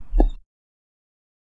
stone footstep 3
Footsteps on stone recorded with a Zoom Recorder
footsteps, footstep, walking, step, stone, steps, foot, feet, walk, field-recording